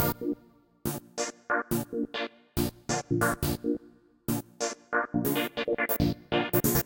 Even more synth stuff
awesome, loops, sounds, synthesizer